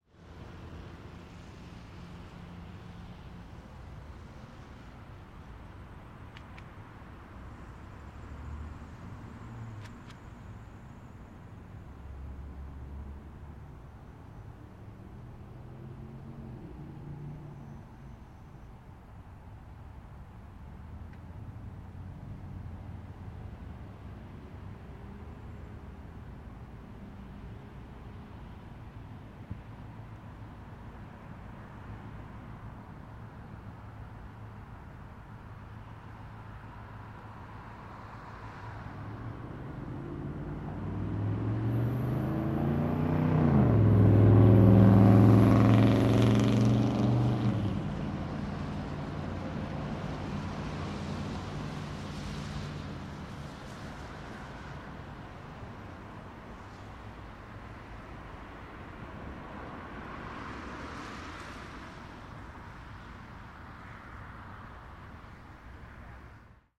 Traffic Intersection Rain 4
This is a recording of light traffic near an intersection in downtown Knoxville, TN, USA on a rainy evening.